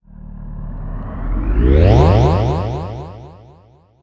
Spaceship take off sound effect.
airplane, alien, cosmos, effect, sfx, sound, space, spaceship